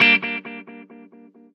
DW GM CHORD
DuB HiM Jungle onedrop rasta Rasta reggae Reggae roots Roots